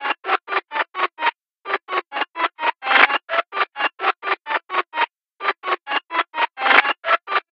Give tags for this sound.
synth melody loop silly

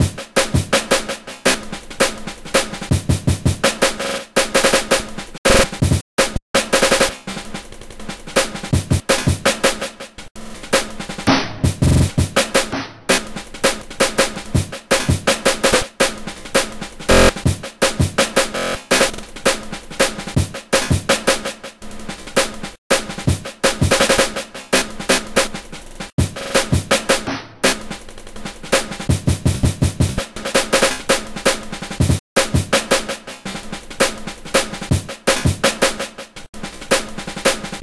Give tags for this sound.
drum breakbeat drumbeat beat loop drum-loop jungle drumloops loops drumloop breakbeats bass snare beats quantized drums

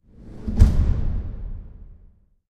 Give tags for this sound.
large strike film movie drum trailer

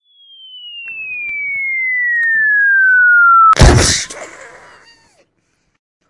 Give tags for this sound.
dirty exploding fall falling mouthnoises splash

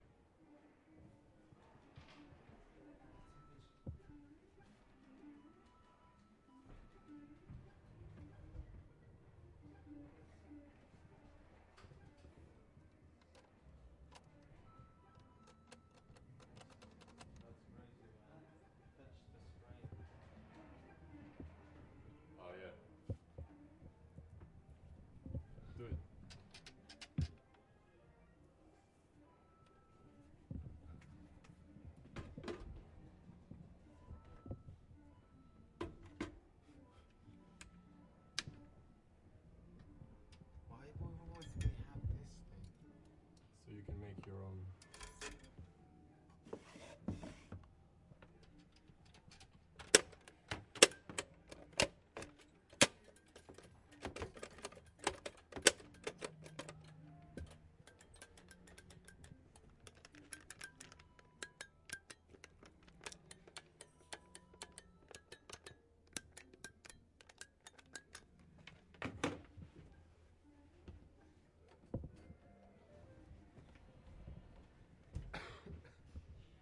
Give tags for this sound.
game sound video

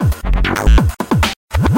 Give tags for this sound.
parts remix

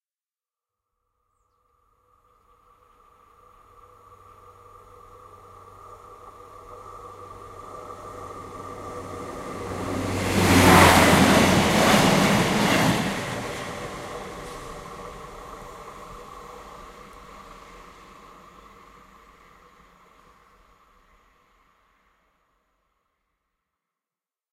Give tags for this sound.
around express railroad ride train

